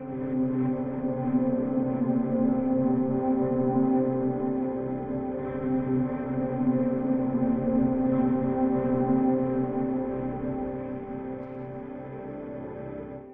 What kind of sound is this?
ontheland90bpm
Mellow and big texture from pads and drones.Ambient texture. 90 bpm 4/4. Duration: 5 bars.
envirement, ambient, textures, drone, pad, synth